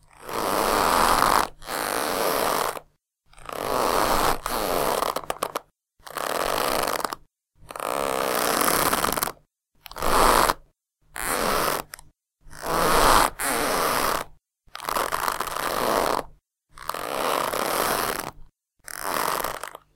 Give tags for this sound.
bending
creak
creaking
friction
leather

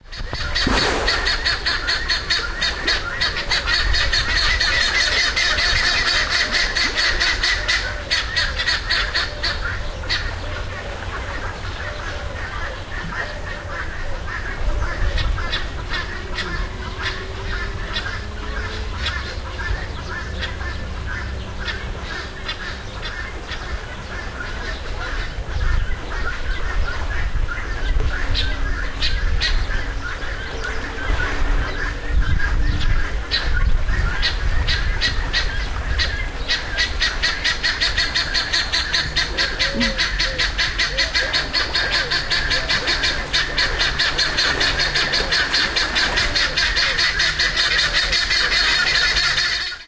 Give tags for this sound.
animals
birds
field-recording
hippo
hippopotamus
zoo

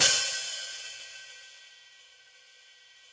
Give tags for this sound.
1-shot
cymbal
hi-hat
multisample
velocity

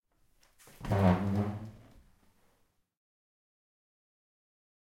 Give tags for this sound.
floor
chair
Panska
CZ
furniture
food
eating
drinking
drink
wood
Czech
canteen
Pansk

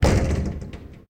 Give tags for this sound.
glass
smash
window

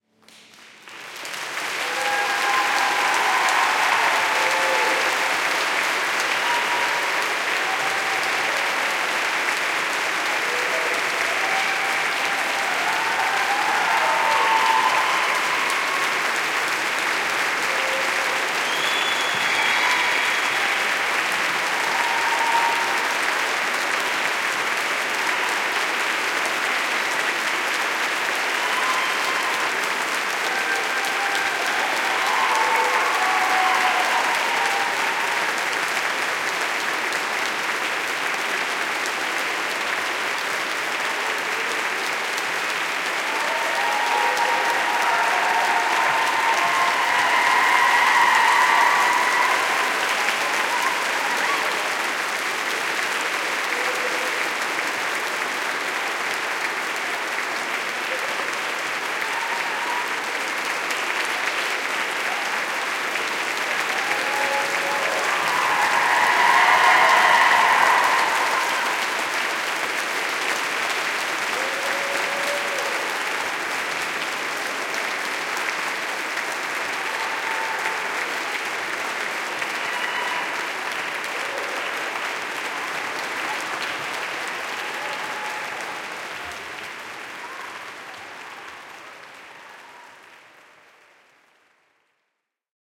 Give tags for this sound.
rapturous
cheer
performance
children
applause
choir
saskatoon
reaction
adulation
audience
cheering

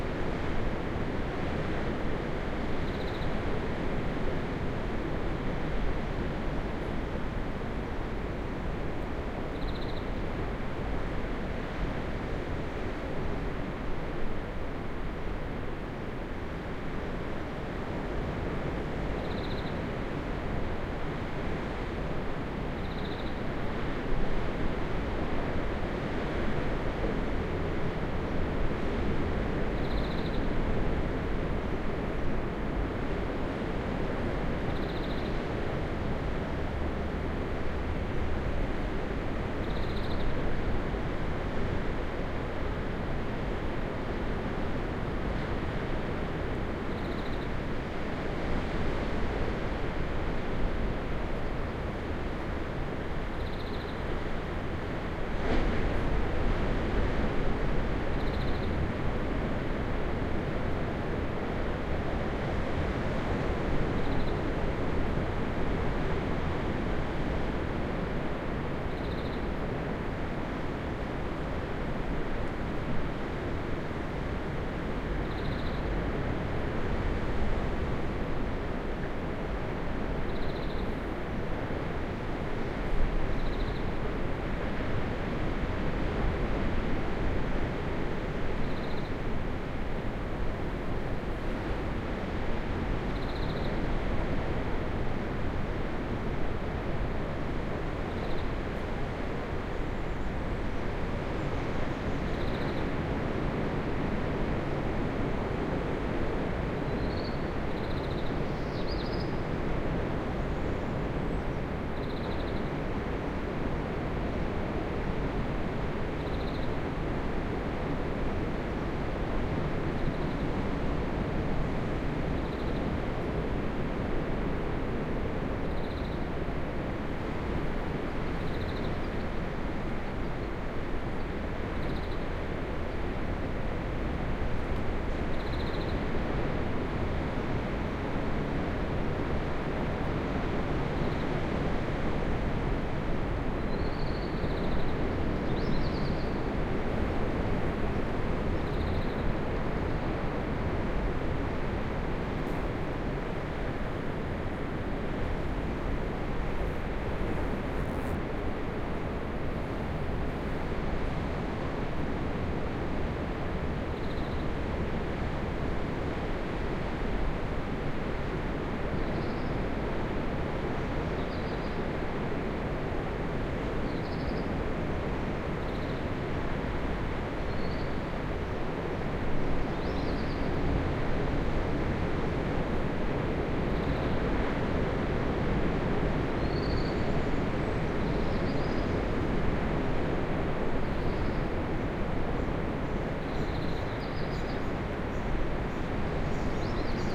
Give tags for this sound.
atlantic
beach
binaural
field-recording
ocean
rock
sand
sea
sea-side
spring
storm
surf
tide
water
wave
waves
wind

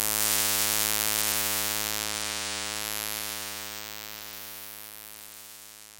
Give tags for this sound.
Image-To-Sound
Soundeffect
Remix
Sound
Image

